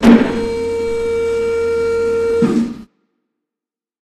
Estlack liftB 2
hydraulic lift, varying pitches
hydraulic, machine, machinery, mech, pneumatic, robot